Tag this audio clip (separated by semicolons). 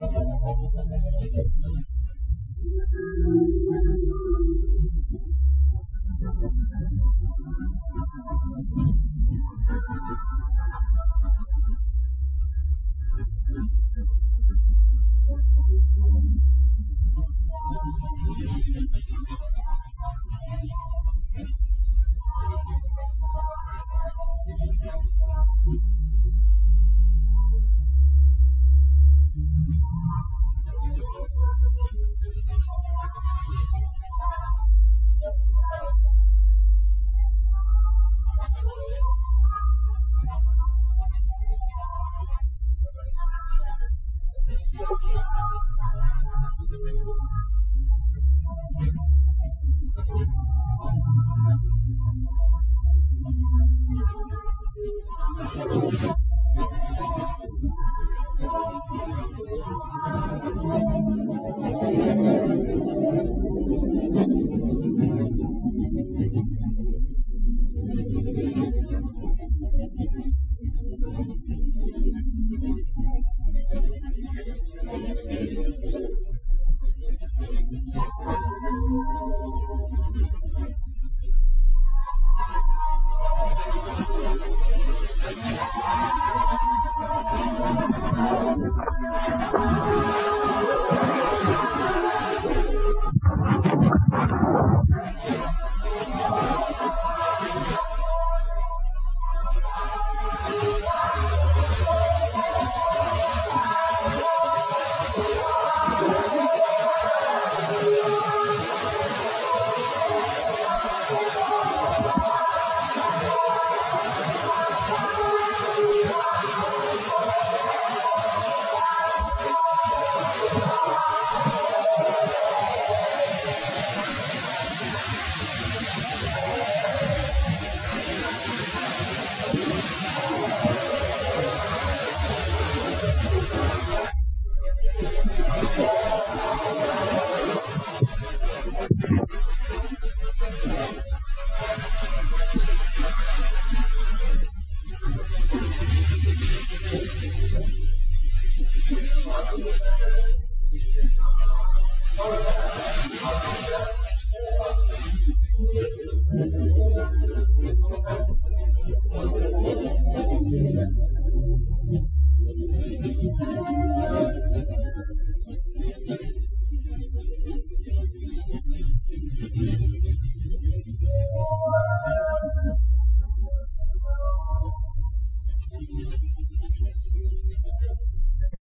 My; Sound; Mall; Recording; Funny; Canimals; Cartoon